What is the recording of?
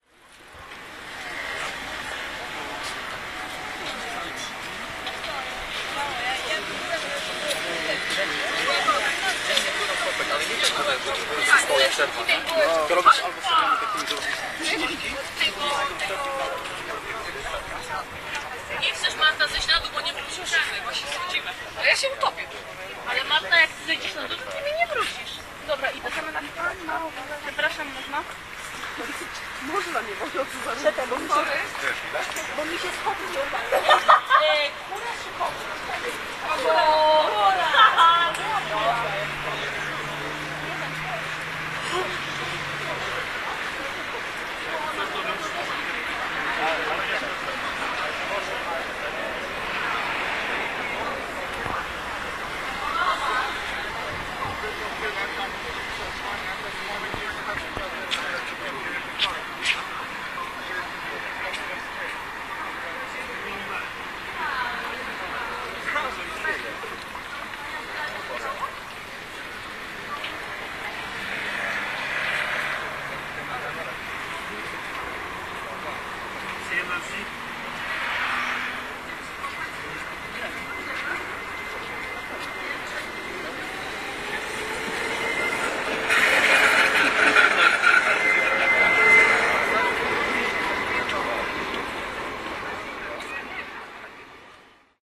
warta river2 280510
28.05.2010: about 23.00. In a park located on the Warta river bank (in the center of Poznan, near of the Sw. Roch bridge). at that moment we have the flood and many, really many people walking along the Warta river banks observing how fast it is overfilling. The sound produced by dozens young people walking, sitting in park benches, drinking beer, smoking joints, talking and watching the Warta river.
night, voices, warta-river, city-park, park-bench, people, open-air-party, youngs, field-recording, flood, poznan, poland